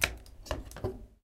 closing a washing machine 01
The sound of closing the door from a washing machine.